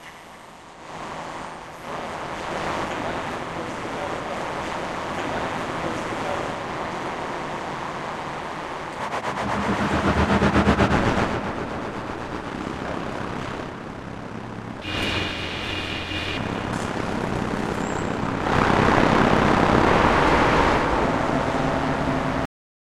noisy drone sounds based on fieldrecordings, nice to layer with deep basses for dubstep sounds
drones, dub, experimental, fieldrecording, noise, reaktor, sounddesign